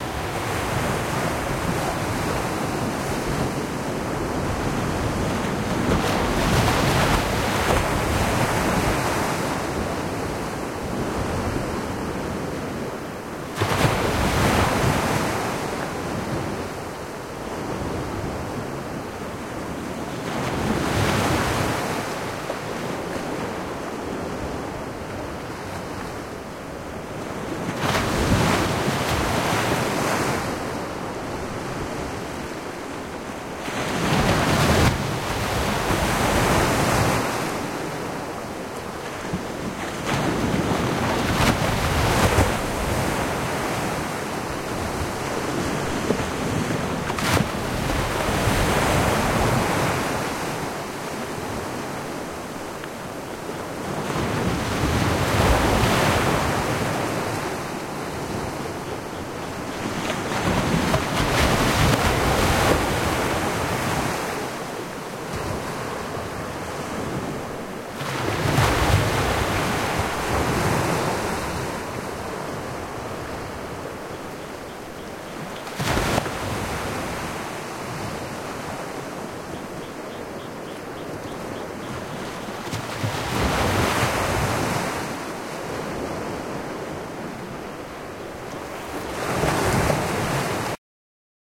The sea was fairly choppy/rough and this is a recording of the waves crashing against a break water/wall with some occasional spraying sounds.
Theres a great stereo effect of the waves hitting from left to right.
Could be a good sound for any on boat/stormy sea needs.